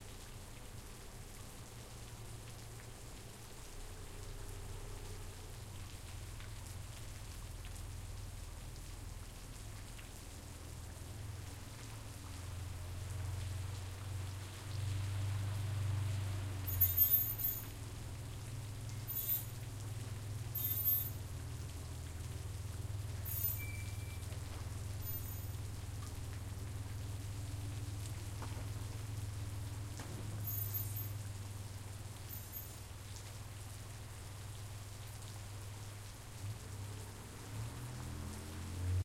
Brakes Squeak in Rain
Brakes squeaking when a vehicle comes to a stop in the rain